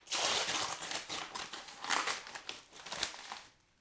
rip paper 2012-1-4
Ripping up a piece of wrapping paper. Zoom H2.